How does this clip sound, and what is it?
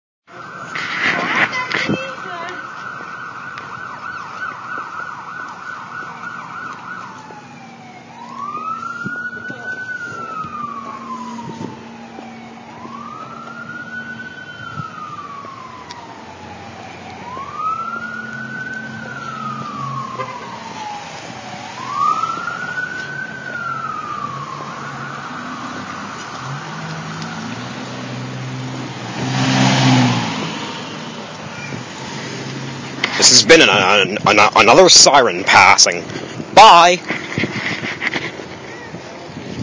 Ambulance Passing Part 2
Passing, Sirens